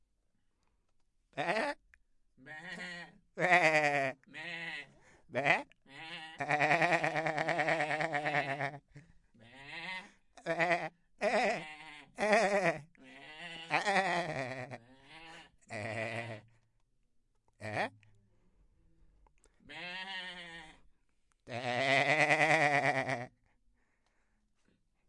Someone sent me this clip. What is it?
The Shure SM58 dynamic microphone and NEUMANN TLM 103 microphone were used to represent the sound and even human-made goat talk
Recorded for the discipline of Capture and Audio Edition of the course Radio, TV and Internet, Universidade Anhembi Morumbi. Sao Paulo-SP. Brazil.